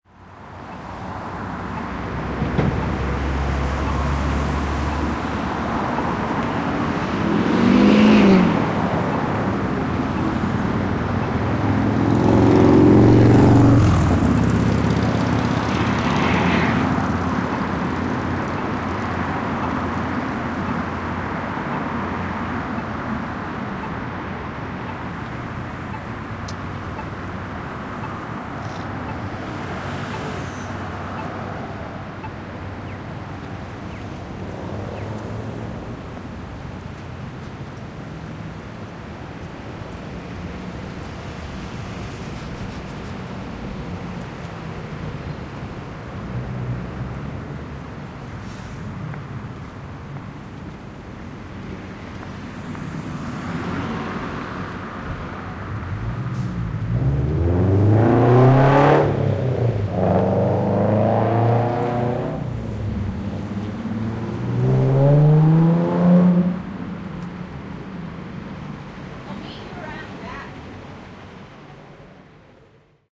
Zoom H2N recording of street sounds on Main Street, Winnipeg Manitoba, Canada.